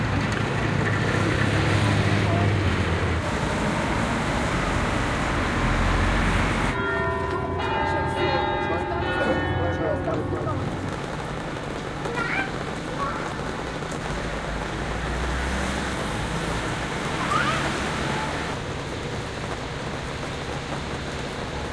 Paris Notre-Dame outside
City of Paris, traffic noise and church bells of Notre-Dame.